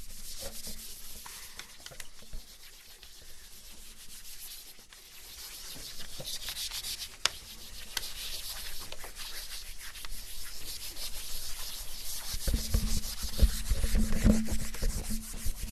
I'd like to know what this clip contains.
TCR Sonicsnaps HCFR Anouck,Naïg,Florine,Clara chalk
france, pac, sonicsnaps, TCR